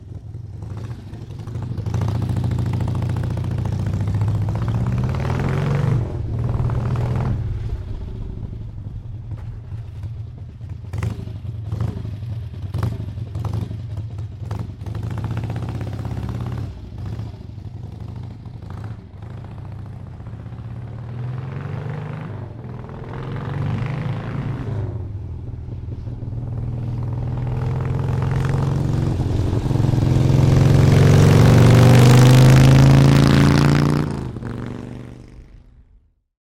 Harley Davidson XLCH 1974 6
Harley Davidson XLCH 1974, 1000 cc, during riding recorded with Røde NTG3 and Zoom H4n. Recording: August 2019, Belgium, Europe.